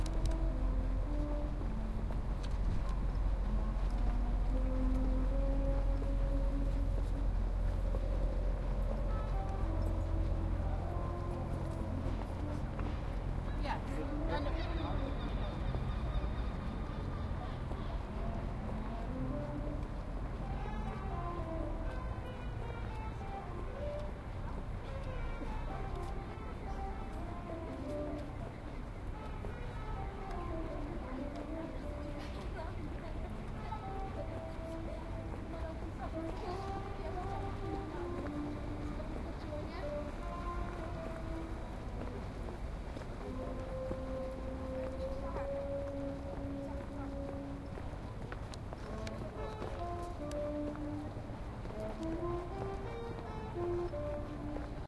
saxophonist on horned bridge bristol
Recorded on Edirol R1 using internal mics, back in the days when I used to use it as an MP3 player as well. Sunny day in Bristol some years ago, I'm walking whilst recording, people are talking, some bloke is playing a saxophone, seagulls are doing what they do.
talking, saxophone, walking, street-sounds, city, seagulls, bristol